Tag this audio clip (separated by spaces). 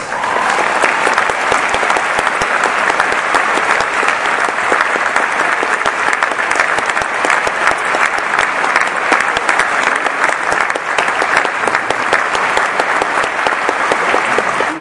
applauding applause appreciation audience auditorium cheer cheering clap clapping concert-hall crowd group polite